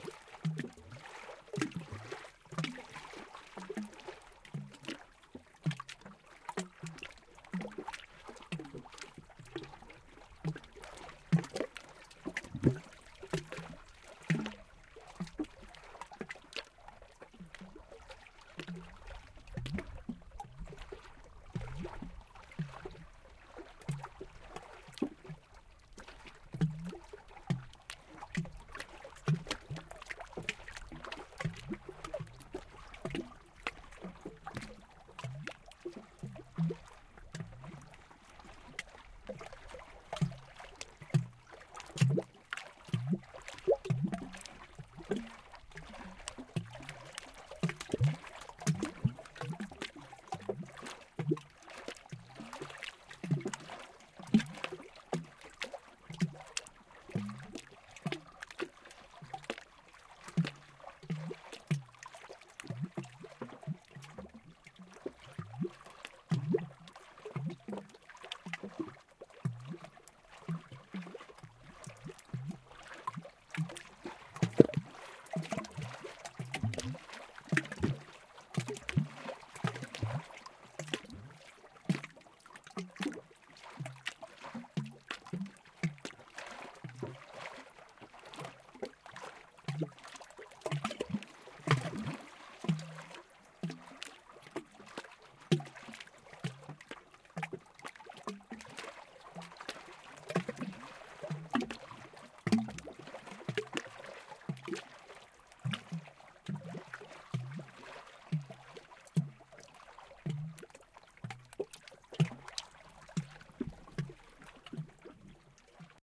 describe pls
These three recordings are perhaps some of my favorites that I have recorded so far. It was one of those lucky moments where the waves on Coldwater Lake were hitting a tree just so to make the wonderful noises, soon after I stopped recording the waves changed and the sound stopped. There are three similar recordings of the waves hitting the tree, each recorded from a different position. Recorded with AT4021 mics into a modified Marantz PMD661.
ambient, nature, wood, liquid, field-recording, water, outside